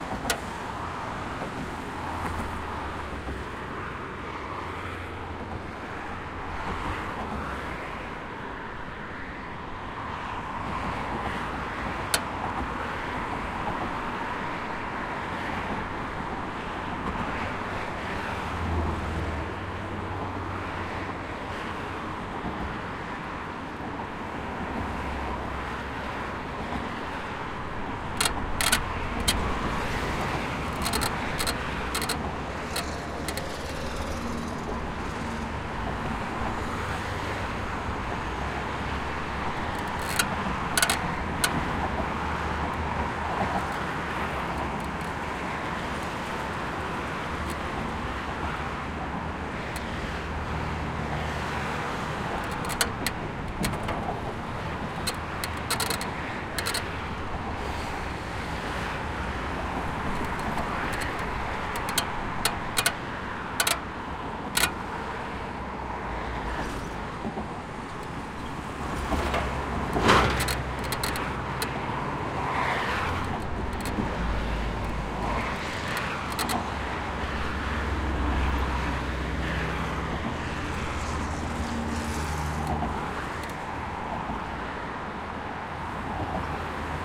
A strange creaks on Leningradskiy bridge.
Recorded 2012-09-29 04:30 pm.